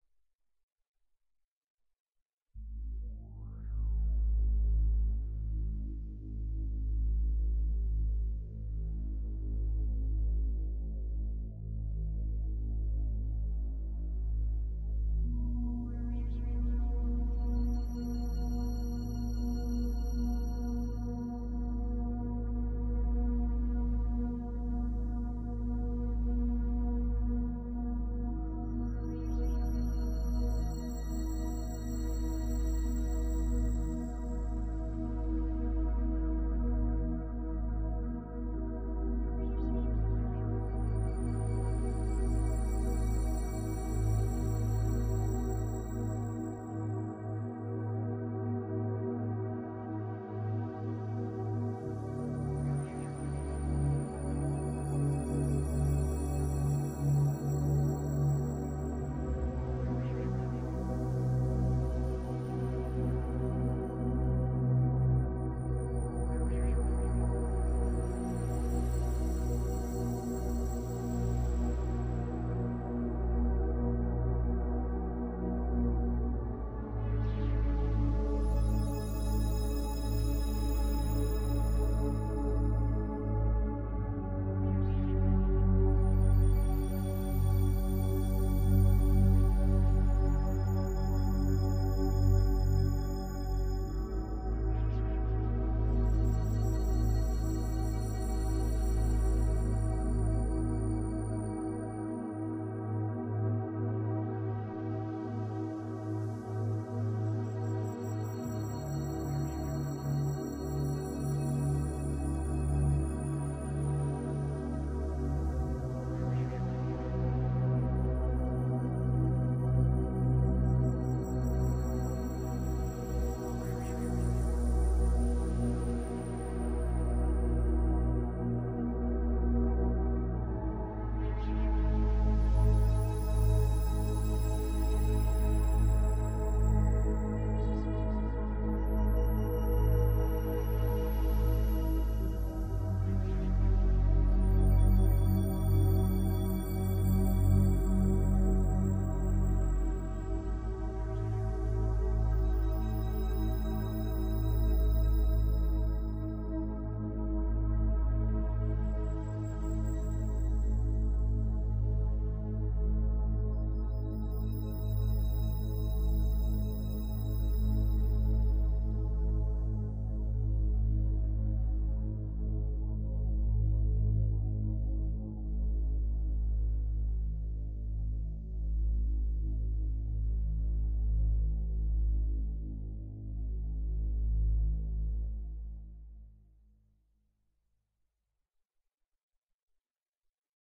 relaxation music #45
Relaxation Music for multiple purposes created by using a synthesizer and recorded with Magix studio. Edited with Magix studio.
meditation, meditative, relaxation, relaxing